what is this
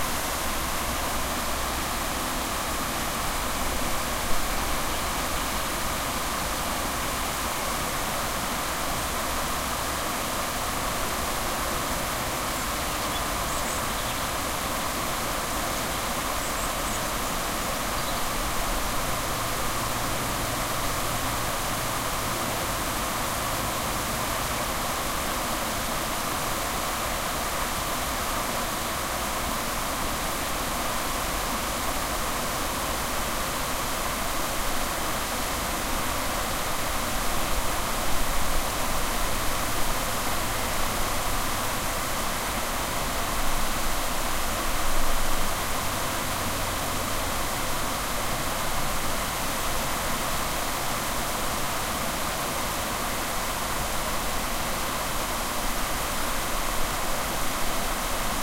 river weir
River water flowing over a weir